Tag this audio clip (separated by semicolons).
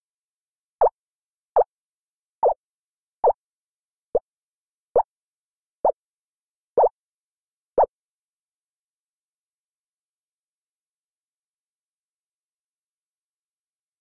de; lluvia; mejorado